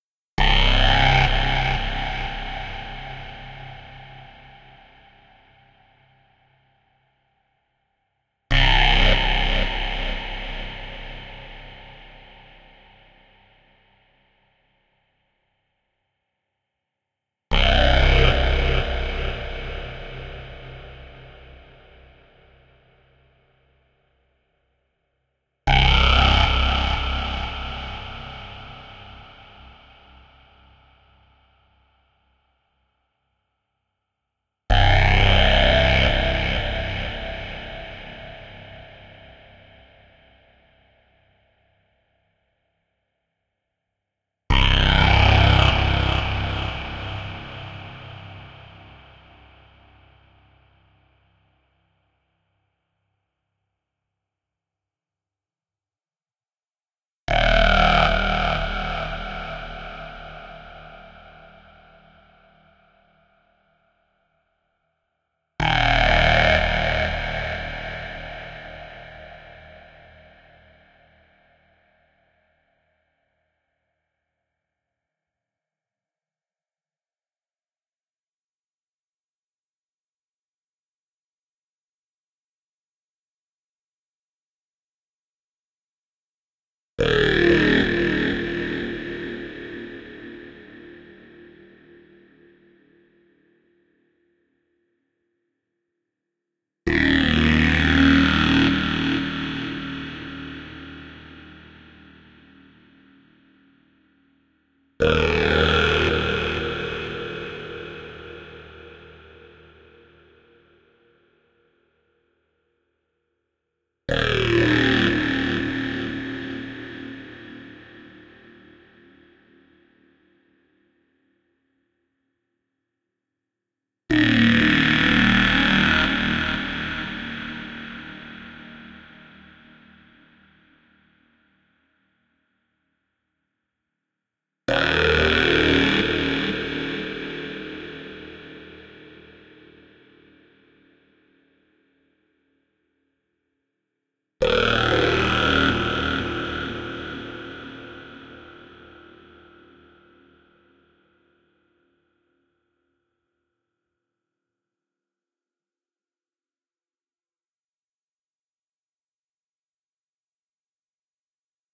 devistating synth monstar
a synth done in reason on the thor. only 1 oscilator. ran through formant filter, distortion, flange, phaser,
monster, synth, psytrance, dubstep, harsh, psybient, psybreaks, dnb, drumstep, neurofunk, wobble, reese, darkstep